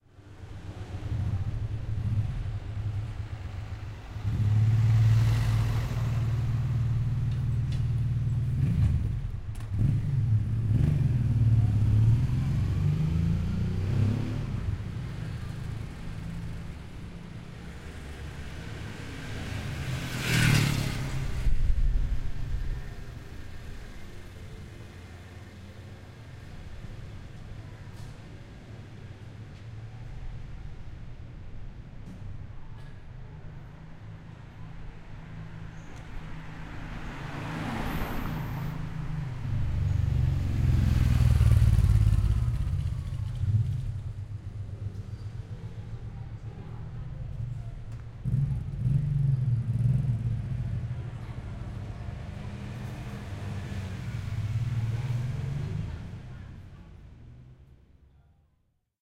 Cars and motorbikes passes
Calm passes of cars and bikes in via Flaminia, Rome.
car, field-recording, motorbikes, traffic